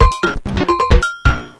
JCA loop-03
short circuit-bent loop from my casio ct460 keyboard